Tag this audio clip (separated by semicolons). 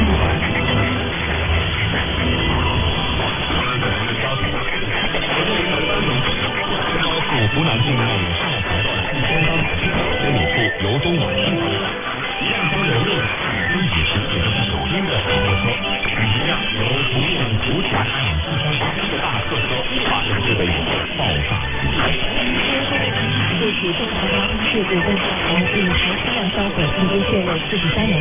AM; dare-28; interfeerence; overlap; radio; radio-stations